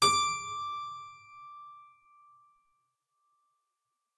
Harpsichord recorded with overhead mics
Harpsichord, instrument, stereo